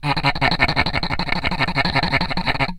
ape.rubba.16
daxophone, friction, idiophone, instrument, wood